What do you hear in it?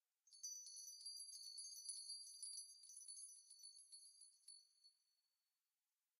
I took 3 small bells and shook each one separately, then mixed them into one.